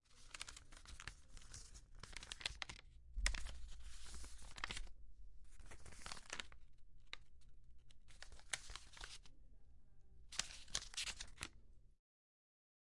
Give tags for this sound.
Newspaper; Paper; Taking

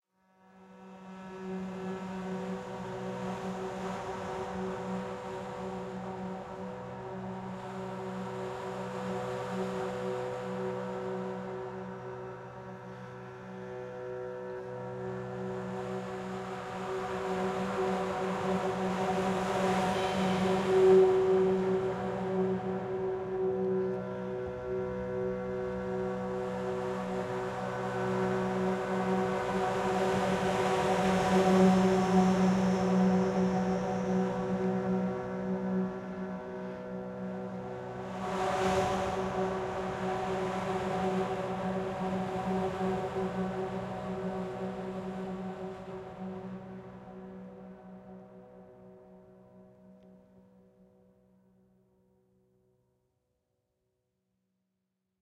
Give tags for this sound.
drone; pad; viole; airy; bass-flute; string

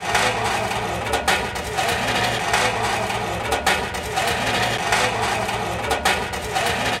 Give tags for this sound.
Chair; drag; drag-chair; metal

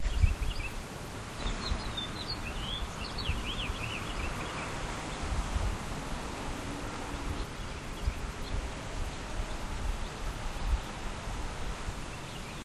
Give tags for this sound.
tweeting; nature; singing; birds

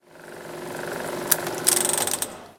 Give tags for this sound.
field-recording; projector

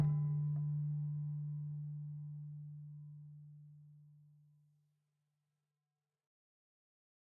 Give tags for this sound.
glockenspiel; vibraphone

